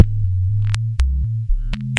bassline with clicks e c120bpm